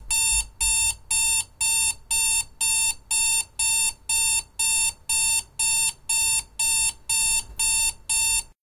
Stereo Recording of an Alarm Clock.